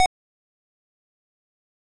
enemy green
beep, blip, pong